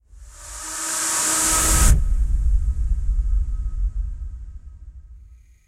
A sound to use in a game
Made with Native Instrument's Rise And Fall plugin
buildup, jumpscare, scary